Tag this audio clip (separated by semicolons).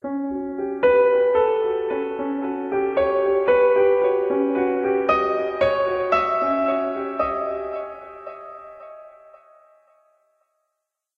phrase; piano; reverb